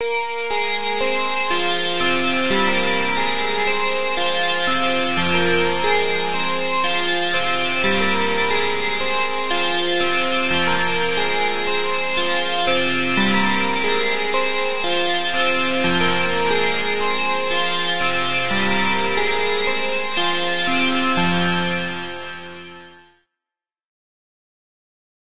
DX7 Bells Loop #1
DX7 Bells in Serum
Dance, Electric, EDM